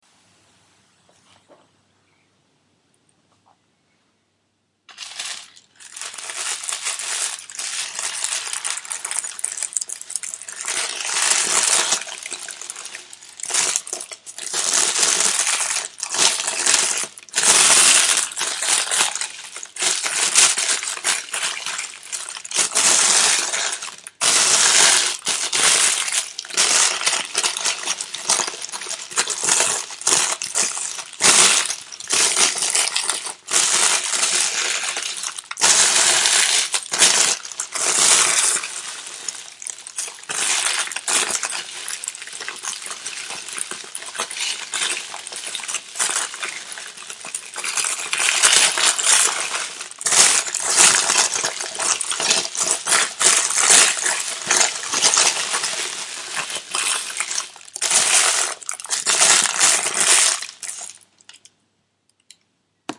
The sounds of the wrapping paper